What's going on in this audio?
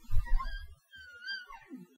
leaning on my computer chair that desperately needs some WD-40.
chair, creak, squeak